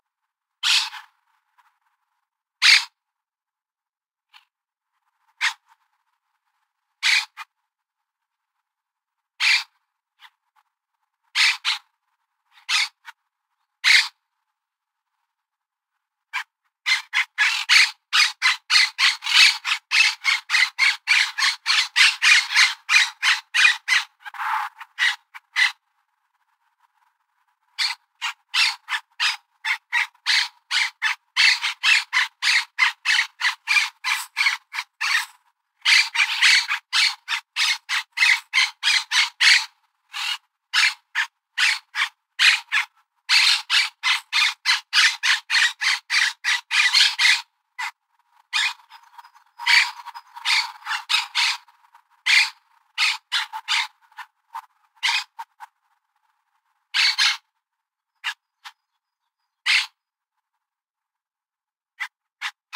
single bird
single, bird, tweet